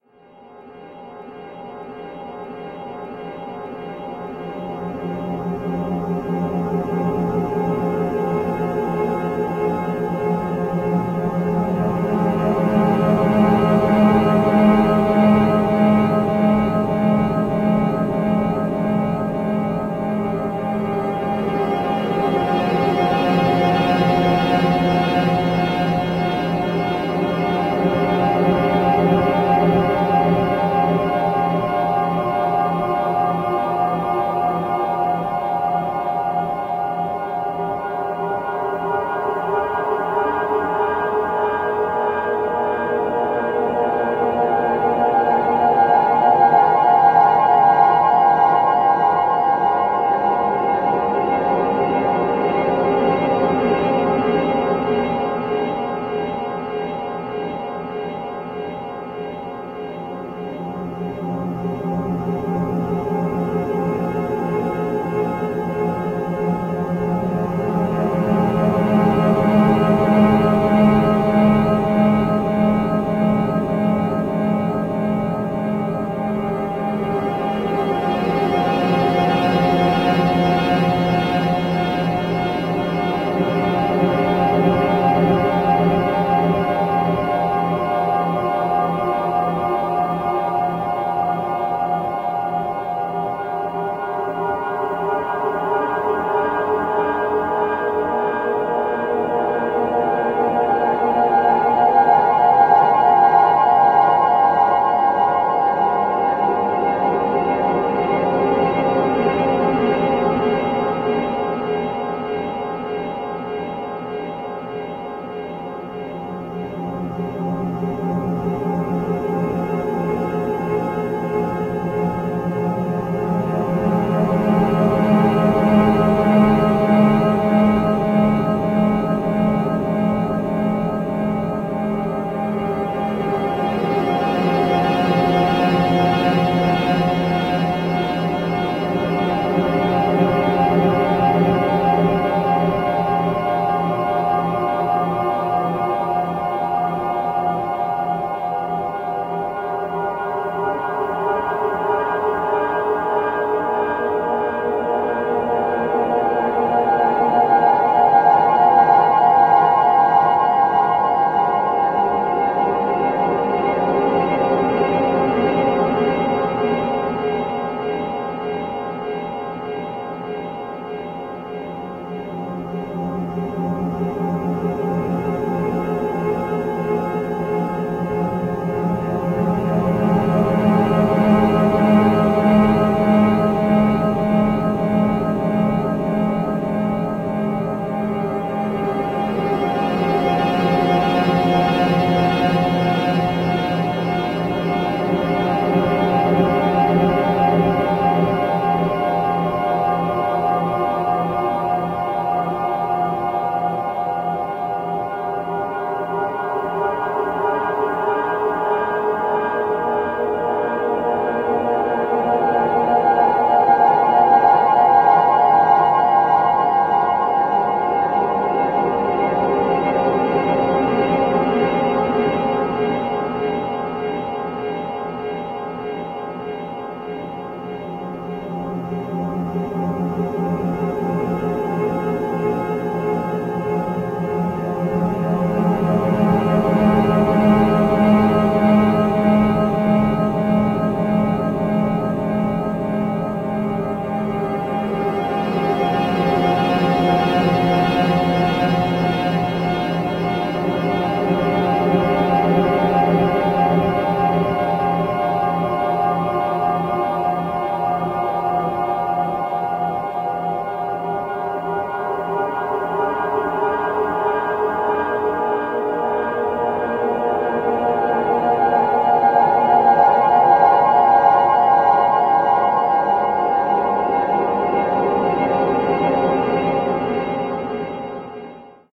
Granular processed piano